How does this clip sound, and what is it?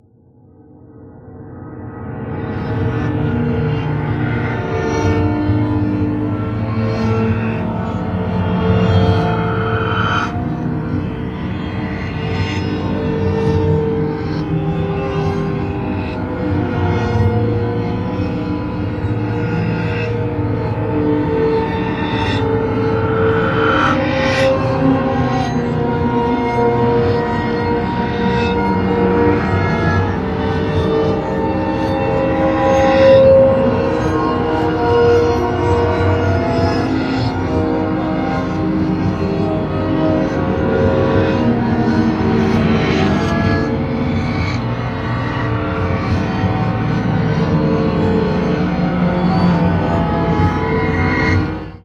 This sound is a take on your typical 'there's something in the room with me but I can't see it but it scares the hell out of me' type of sound
paranoid, spectre, horror, spooky, creepy, freaky, ethereal, ghost, scary, supernatural, presence, unnatural, room, paranoia